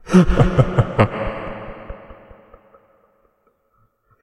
Long Laugh 1
Sound of a man laughing for a while with Reverb, useful for horror ambiance
ambiance, creepy, drama, evil, fear, fearful, haunted, horror, laugh, phantom, scary, sinister, spooky, suspense, terror